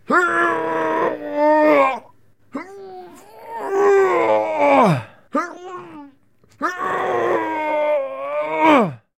Man grunt, struggling